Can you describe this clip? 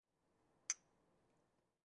Tea Cups Clinking quiet and soft
Tea cups clinking together. Recorded with an H4N recorder in my dorm room.
clink, tea-cups, water